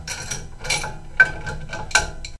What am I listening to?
mono field recording made using a homemade mic
in a machine shop, sounds like filename--metal buffing machine
field-recording, machine, metallic, percussion